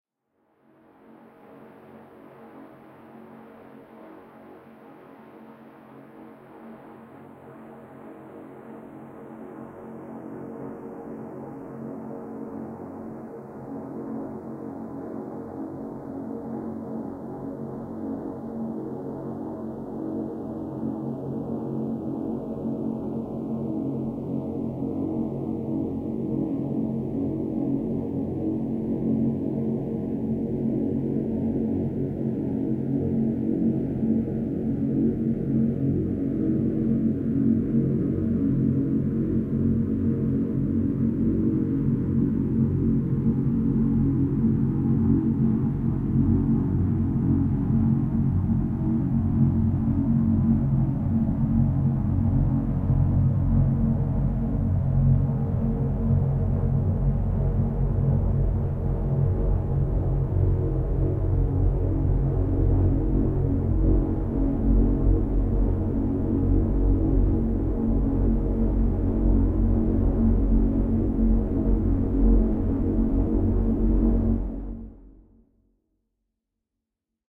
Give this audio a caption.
This one fades in and out, has a fixed tonal structure, but the filtering (most of it) sweeps downward. Since it is mostly very low-frequency content, the filters cut out most of the content at the start, but it comes into full play toward the end. It's low-pass filtered noise with multiple complex delays with feedback that have a harsh but stereo-correlated effect forming a soundscape with the impression of metal, pipes (large tubes), and perhaps the engines of some fictional vessel. Created with an AnalogBox circuit (AnalogBox 2.41alpha) that I put together. I doubt this one is as useful as the others with similar names, but someone might find it useful, especially if you speed it up and do more processing on it. You can even reverse it to get the sweep to go upward.